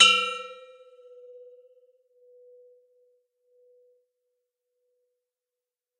hi tube
Field-recorded sounds of metals doors and other metallic objects struck, some are heavily processed.
bangs, doors, hits